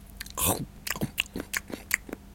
Comical bite and chew great for cartoons.
biting, chew, chewing, eating, munch